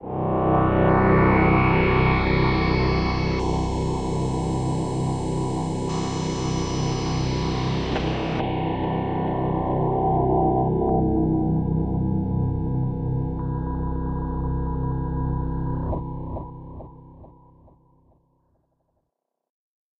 A Sample made with my home made soft synth: Pigasso, a semi modular wave-draw oscillator synthesizer!